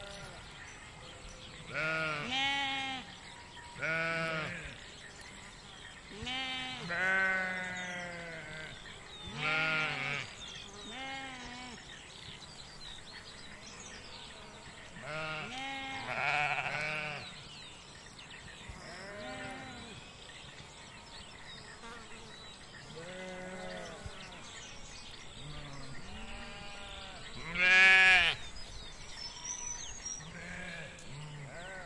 Hollow Tree Ambience #2
Ambience at Hollow Tree, Tasmania: ewes and lambs in paddock, frogs in pond behind microphone.
Recorded on a PMD661 with a Rode NT4, 18 October 2017, 11:23 am.
field-recording, Tasmania, pond